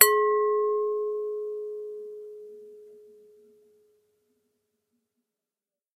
Glass Bowl 4
Large-sized Pyrex bowl struck once with a fingernail. Recorded with a 5th-gen iPod touch. Edited with Audacity.
strike, chime, bowl, ringing, pyrex, bell, ping, ring, ding, glass